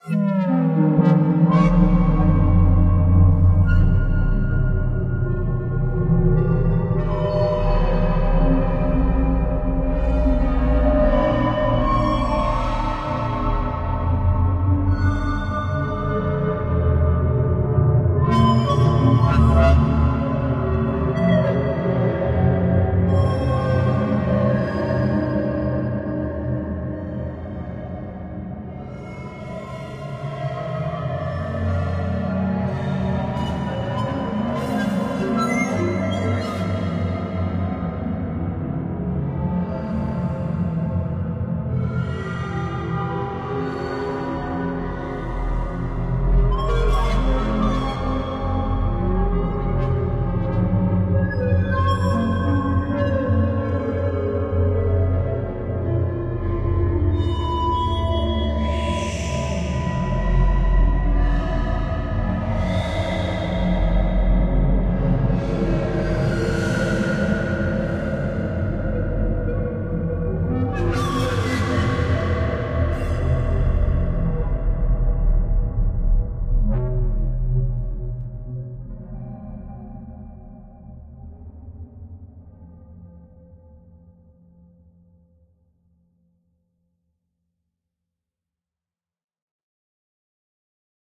Synthesized scraping and howling sounds.